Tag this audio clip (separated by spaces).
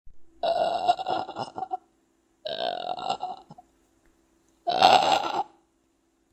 moan groan